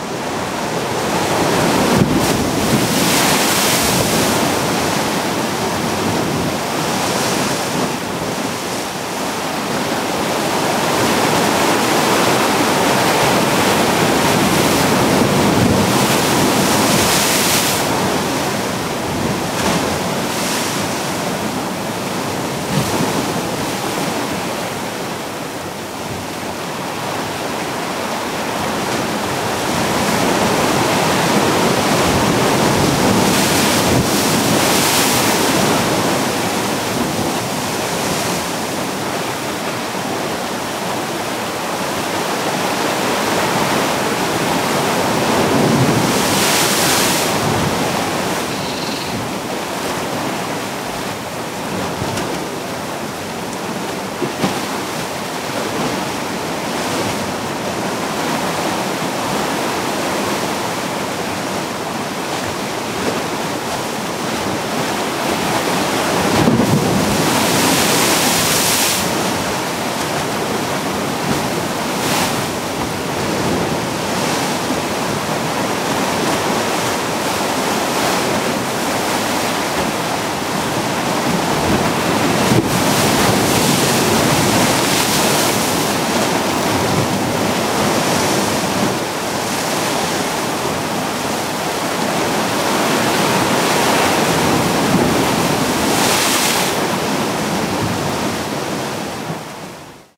Crashing Waves 2
Crashing surf and blow hole at Waianapanapa State Park, Hana, HI.
Maui, blow, surf